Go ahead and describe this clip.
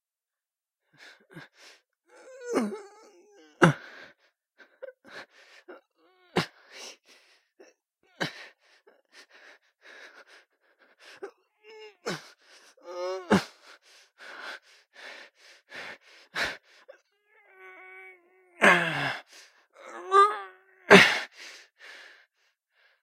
A wounded man is trying to survive.